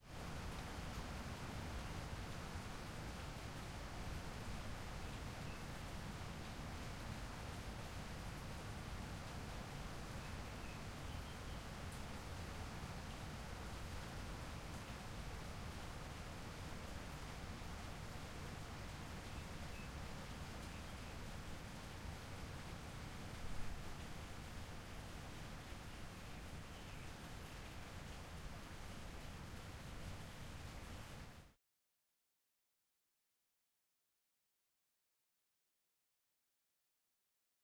rain on sink roof indoors 01
this is part of a series of rain and thunder sounds recorded at my house in johannesburg south africa, using a zoom h6 with a cross pair attachment, we have had crazy amounts of rain storms lately so i recorded them with intent of uploading them here. a slight amount of eq has been applied to each track.